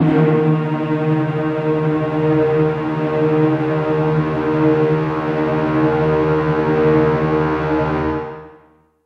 Spook Orchestra D#2
Spook Orchestra [Instrument]
Instrument, Orchestra, Spook